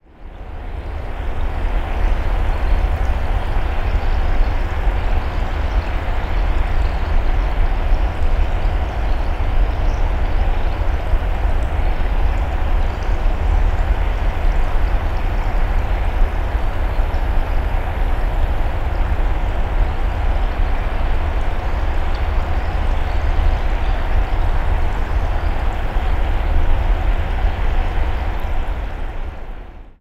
13 Stream, Vast Ditch, Spacious, Flat, Bright, Trickling, Eerie, Flowing, Underwater, Dive Deep 2 Freebie
Enjoy my new generation of udnerwater ambiences. Will be happy for any feedback.
Check the full collection here:
ambience, deep, diver, ocean, pool, scuba, sea, underwater, water